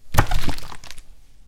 bottle hit 7
Recording of a bottle of water being thrown against my chest or into a bucket containing more bottles and water. Recorded using a Rode NT1 microphone.